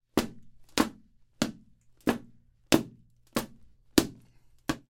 07-Pasos ascensor mujer
caminando en alfombra
caminata, pasos